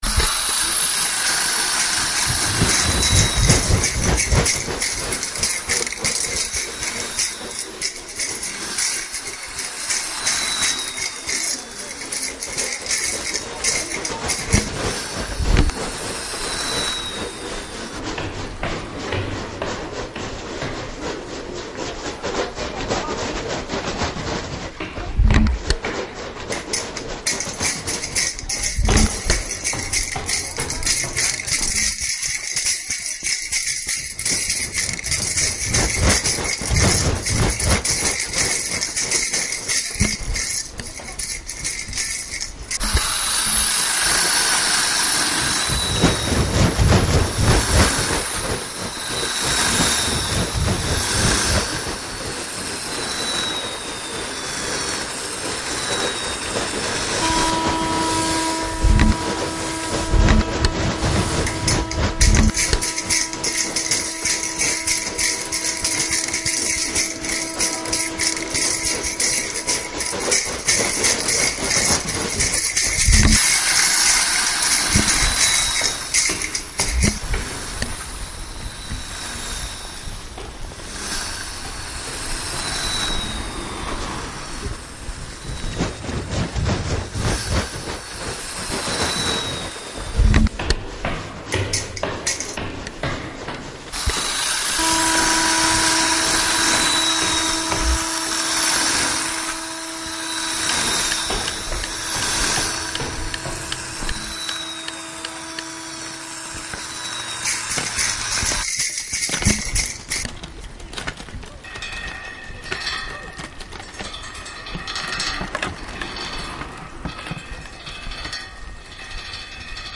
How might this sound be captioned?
Sonicposcards LBFR Serhat
renneslabinquenais, sonicposcards